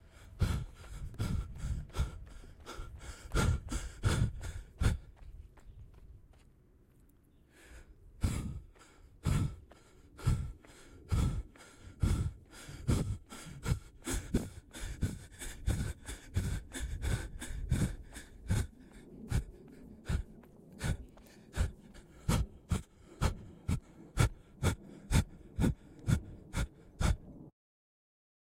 Running while breathing heavily.
run, male, boy, gasp, walk, OWI, man, sigh, tired